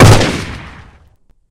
Layered Gunshot 5
One of 10 layered gunshots in this pack.
gun, epic, layered, gunshot, awesome, cool, shot, pew, bang, shoot